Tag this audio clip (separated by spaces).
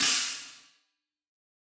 velocity multisample